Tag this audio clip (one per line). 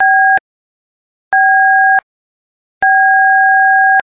dtmf
key